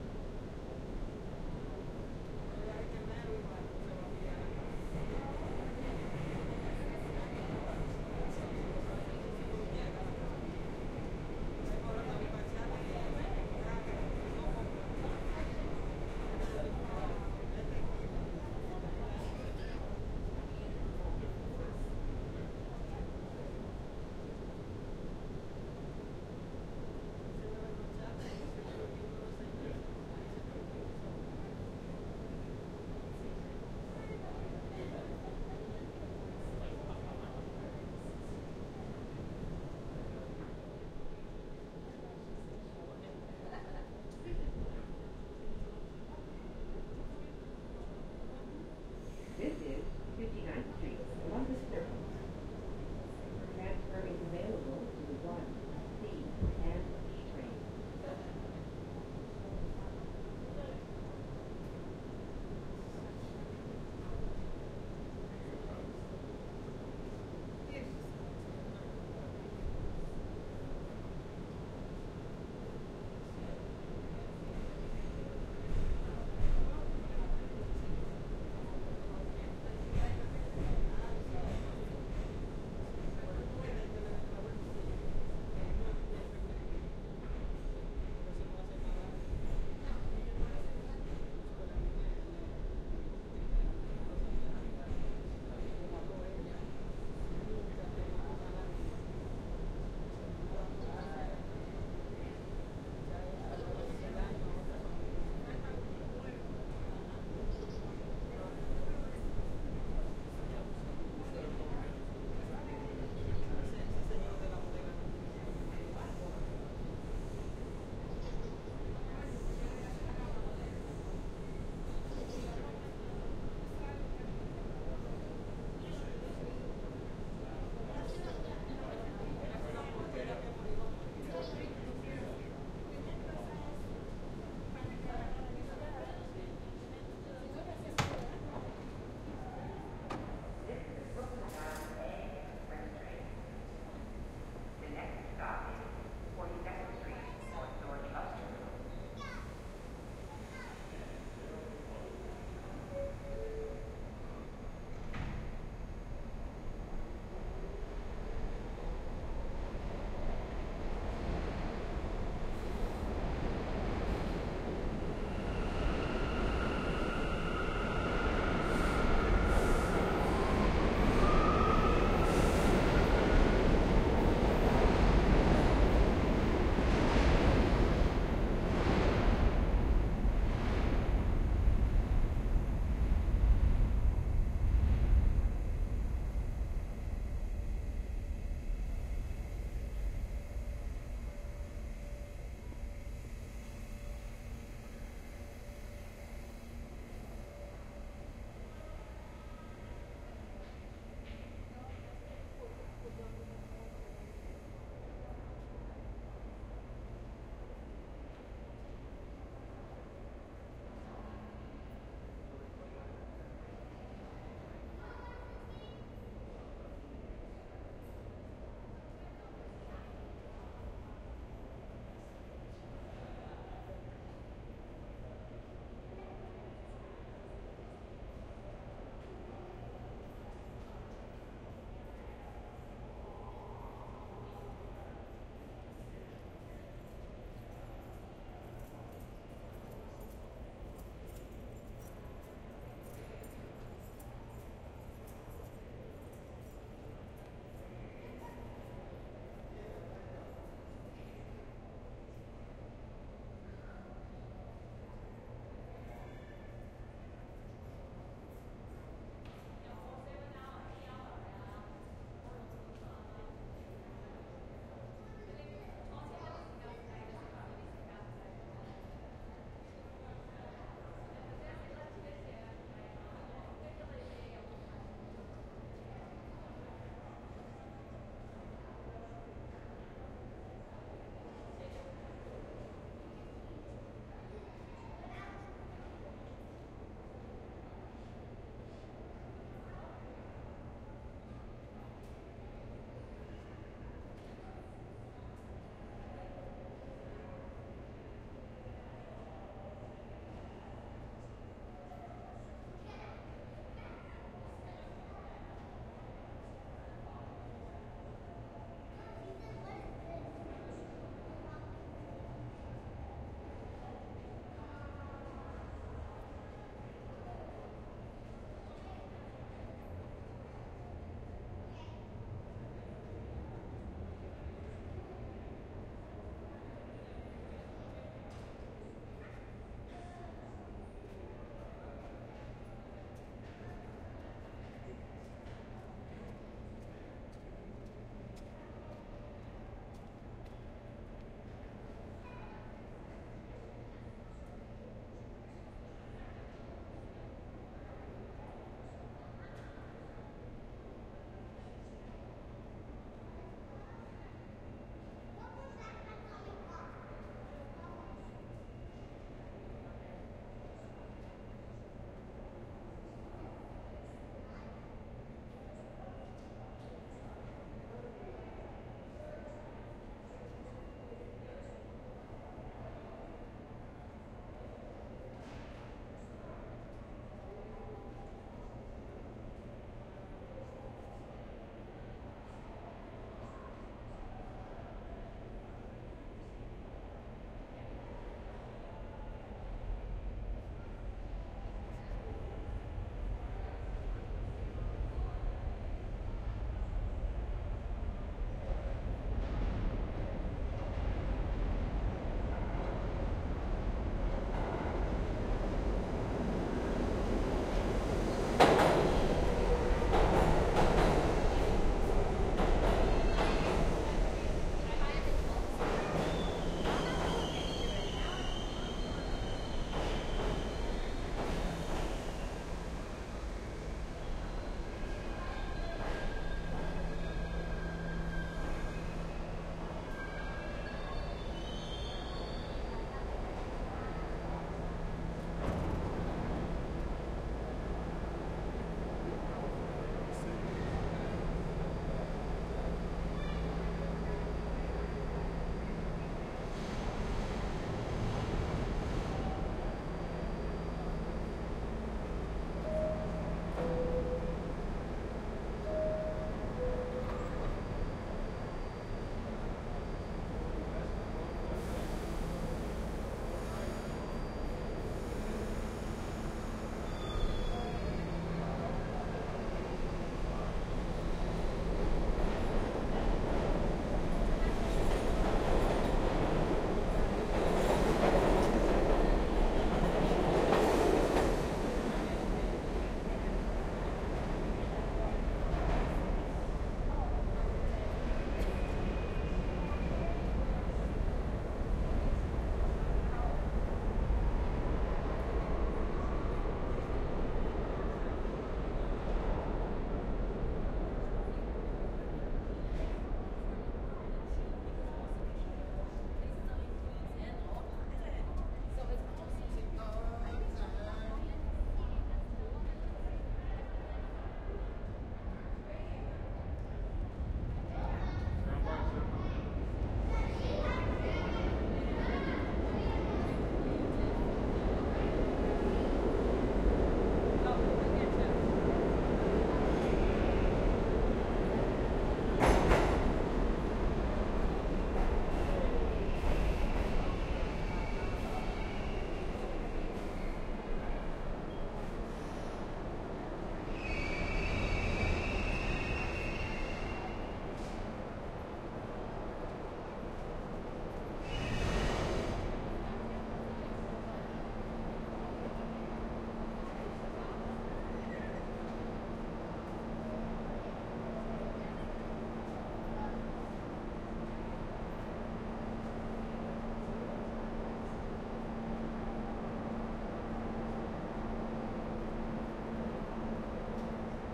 NYC Commute — Harlem to 7th Ave Station (Part 3 of 6)
Credit Title: Sound Effects Recordist
Microphone: DPA 5100
Recorder: Zaxcom DEVA V
Channel Configuration (Film): L, C, R, Ls, Rs, LFE
Notable Event Timecodes
PART 1: 01:00:00:00
01:00:00:00 — Header & Description
01:00:35:00 — Clear / 149th between Broadway & Amsterdam
01:01:10:00 — 149th and Amsterdam
01:02:56:00 — 149th and Convent Ave (Block Party)
01:03:35:00 — Convent Ave between 149th and 148th
01:04:15:00 — Convent Ave and 148th
01:05:25:00 — 148th and St Nicholas Pl (***features uncleared music in vehicle passby***)
01:05:52:00 — Entering 145th St Station Downtown
01:06:18:00 — Turnstile Entrance
01:06:29:00 — Running Down Stairs to downtown A Train
01:06:45:00 — Boarding Train
PART 2: 01:09:38:10
PART 3: 01:19:13:02
01:21:26:00 — Train Doors Open & Exit Train at 59th St / Columbus Circle